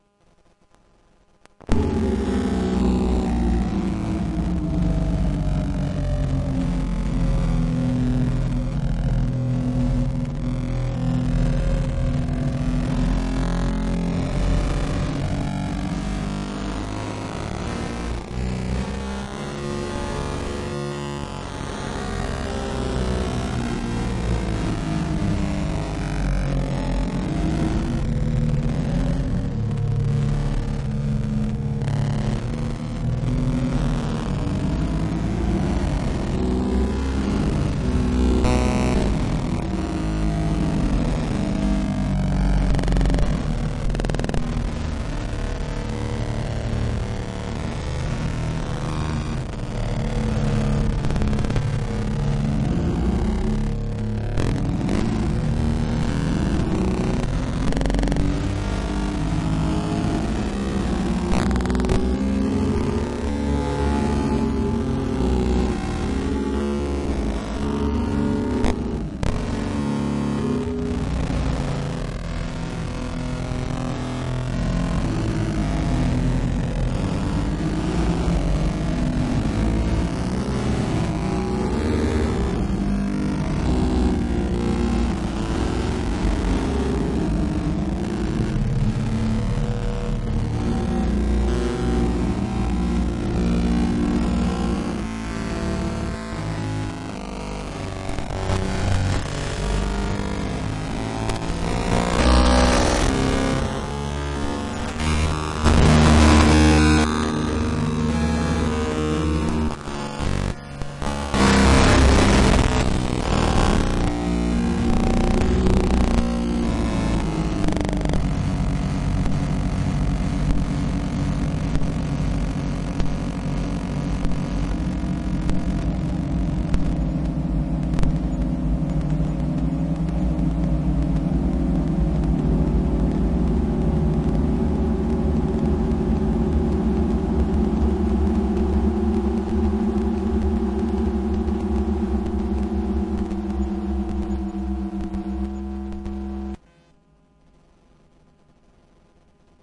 Recorded from laptop running autocrap to PC, internal sound card noise and electromagnetic phone transducer stuck to DC converter.
noise, synth, buffer, sound